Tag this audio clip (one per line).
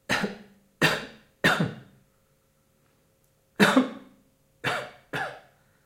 cold
coughing
flu